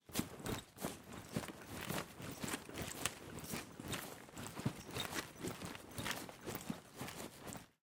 Tools Backpack RattleMovement 003
Foley effect for a person or character moving with a backpack or book bag.
backpack, bag, clothing, gear, movement, moving, tools